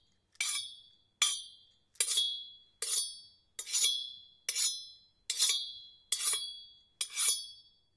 Sliding Metal Rob Against Copper Pipe (Sounds like Sword)
Hitting and sliding metal rod against copper pipe. Sounded similar to a sword.
battle, sword, hit, ring, fight, clang, chang, knight, sliding, Pipe, medieval, blade, combat, metal, swords, sword-fight, clank, ching